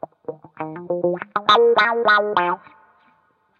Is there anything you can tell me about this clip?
GTCC WH 08
fm, bpm100, wah, samples, guitar